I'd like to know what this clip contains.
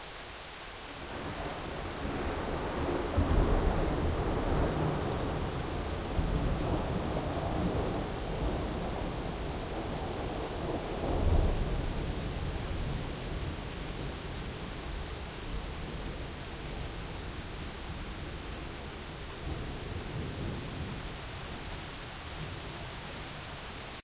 One of the thunderclaps during a thunderstorm that passed Amsterdam in the morning of the 9Th of July 2007. Recorded with an Edirol-cs15 mic. on my balcony plugged into an Edirol R09.
field-recording, nature, rain, streetnoise, thunder, thunderclap, thunderstorm